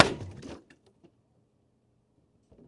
This Is my first Sample pack, I hope it's helpful for you! Many snares, and a few Kicks are in this pack, and also a transitional sound.